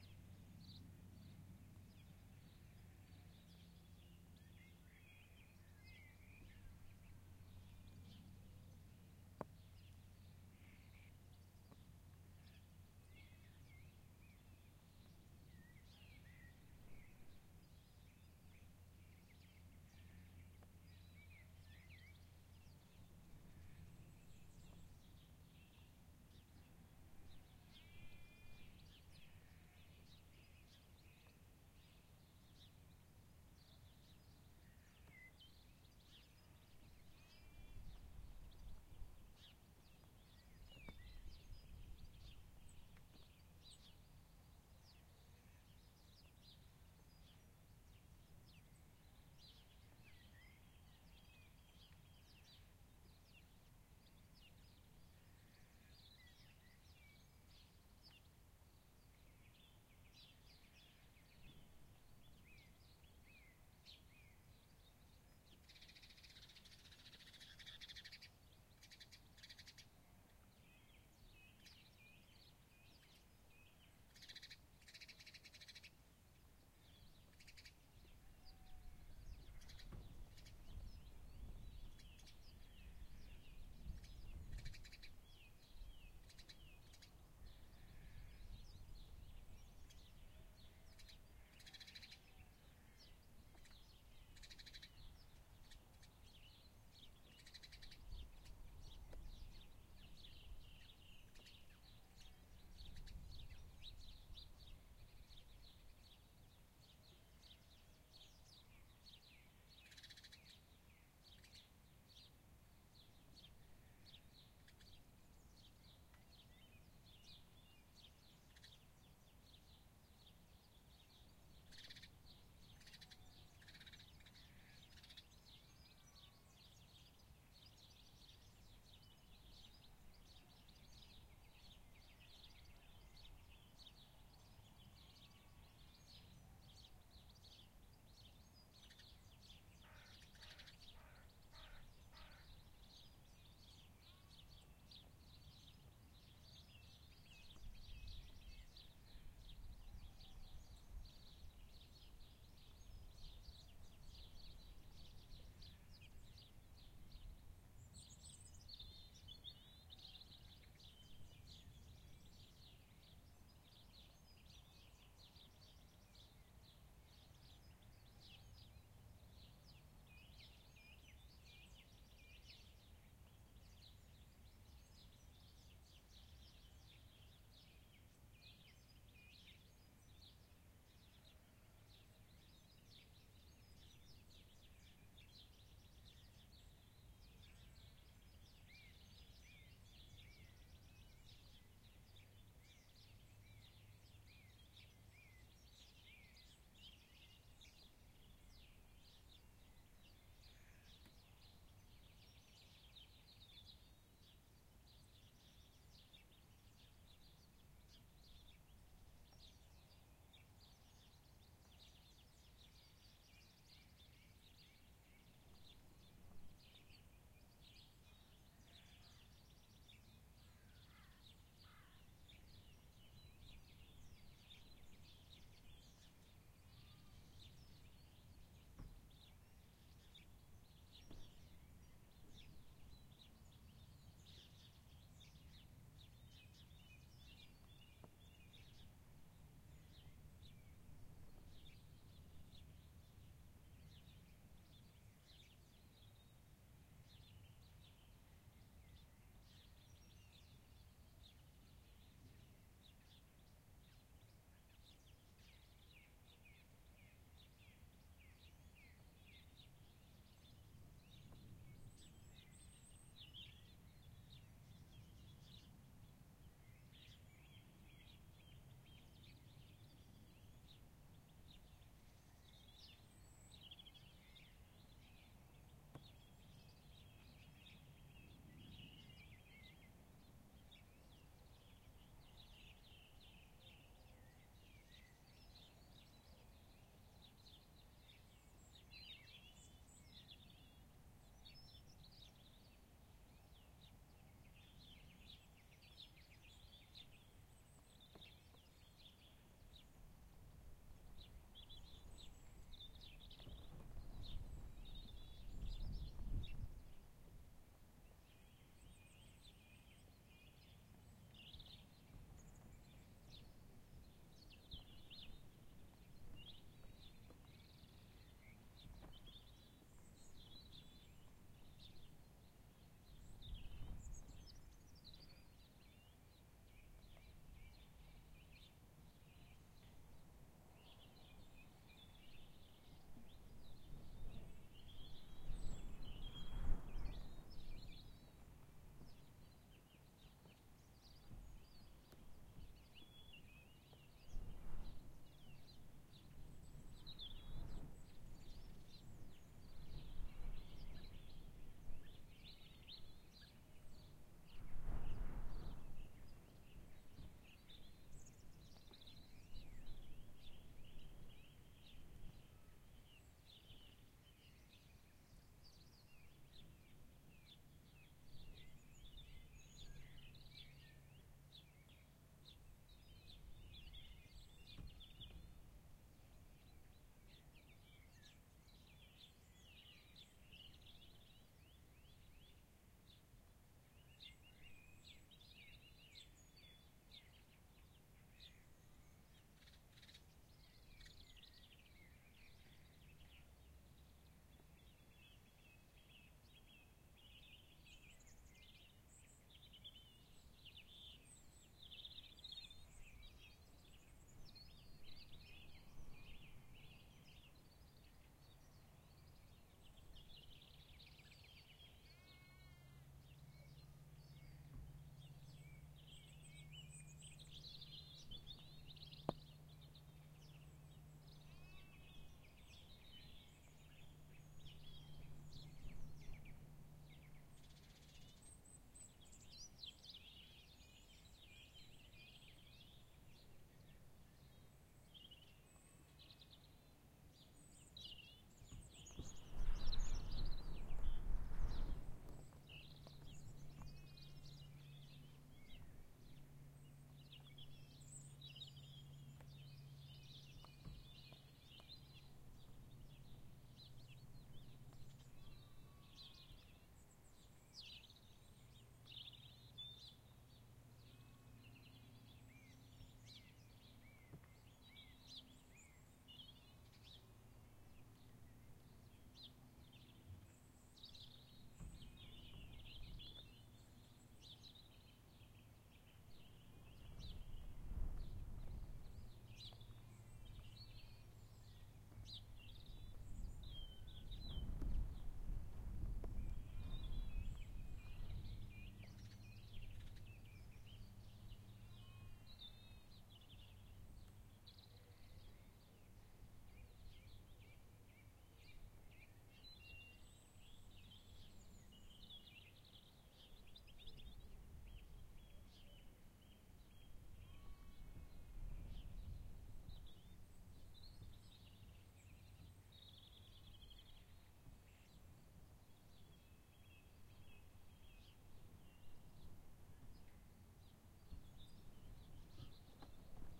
Countryside Birds Lambs Rhos Wales April 8am
All recorded whilst staying in a converted barn in Rhos, Wales. Recorded at 8am. Recorded on my Zoom H4N, there maybe some wind noise in places and maybe a very high flying jet, but mostly it is just the sound of nature. There is a nearby stream in the background too. These are the original uncompressed untreated files.
Hope it is useful to someone
Ambiance, ambience, ambient, atmosphere, bird, birds, birdsong, Countryside, evening, farm, field, field-recording, lambs, nature, sheep, spring, starling, Wales